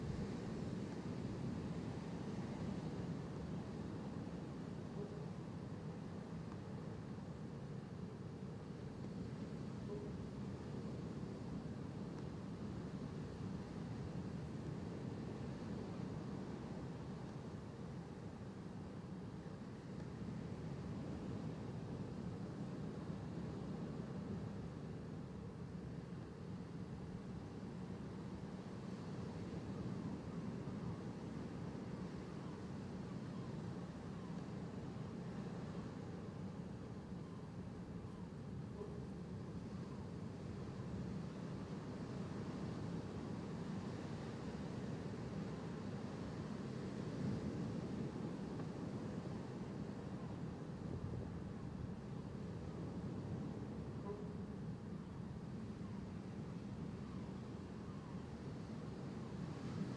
OSMO BEACH ORTF
Short recordings made in an emblematic stretch of Galician coastline located in the province of A Coruña (Spain):The Coast of Dead
beach, ocean, sea